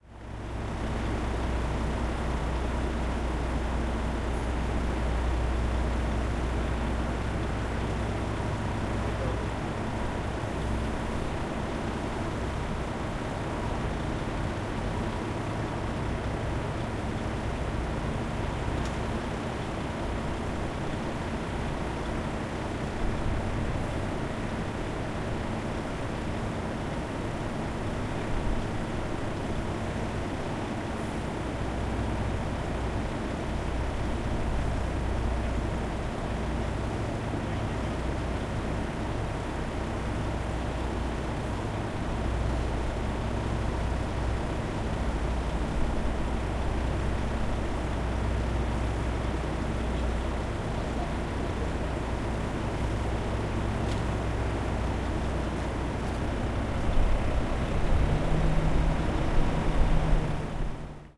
baza tiry2 310711
31.07.2011: about 11 pm. the international logistic company base in padborg/denmark. the first day of my ethnographic research on truck drivers culture.the sound of whirring trucks and sizzling of the electricity pylon in the background.